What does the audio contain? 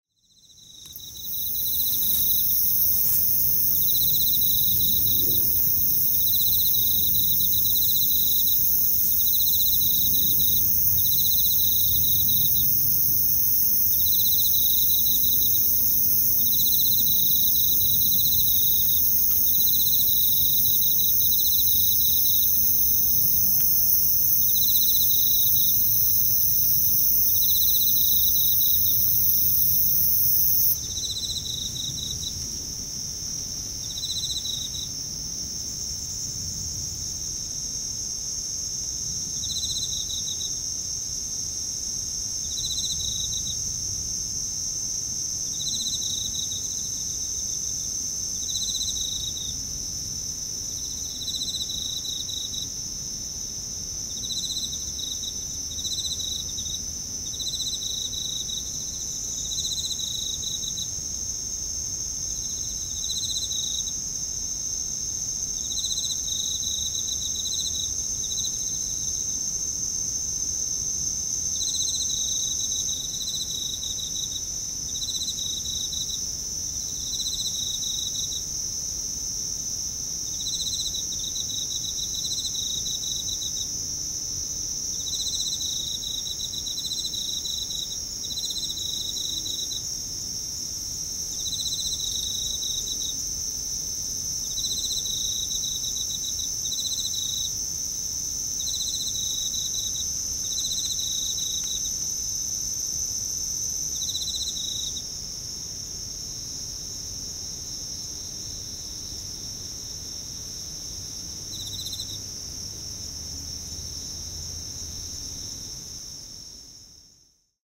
Recorded early September 2016, midnight, Kashiwa, Japan. Equipment: Zoom H2N on MS stereo mode.
ambience, late-summer, quiet, crickets, traffic, ambient, japan, nature, town